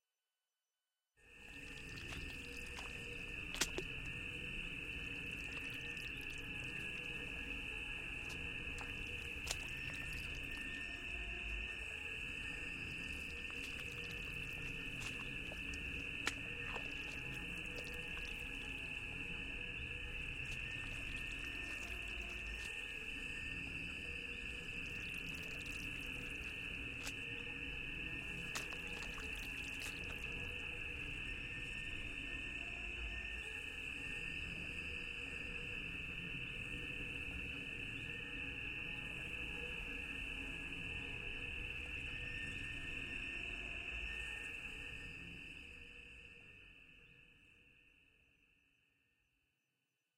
Step into Bio Life Signs
This version contains footsteps moving around in the organic soup of an alien world.
jungle, atmospheric, squelchy, organic, world, alien, synthetic